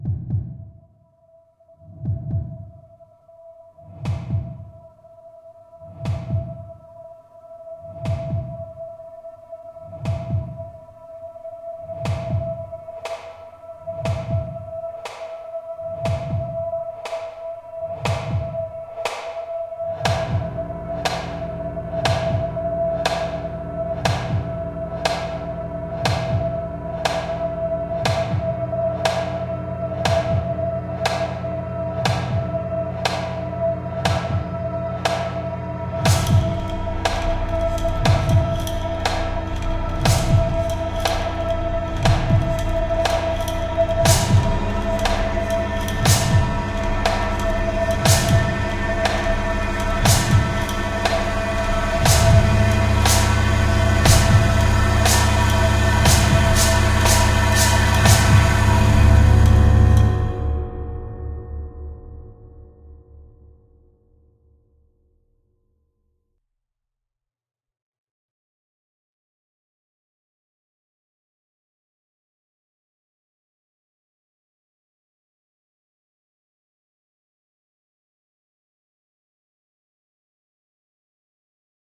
Tension/Horror -- Drumbeats
Composed in MuseScore, synths added with LMMS, additional sound done with Audactiy.
Starts out with bass drums, progresses to synths held on one note, then snares. Double Bass creates rising tension. For bone-clicking sound effects, I just shook a handful of pencils. Cymbals at the end for final climax of the sound.
Released to general public with permission of its creator.
anxiety, anxious, ascending, drama, dramatic, drums, film, horror, increasing, scary, sinister, stinger, suspense, tension, thrill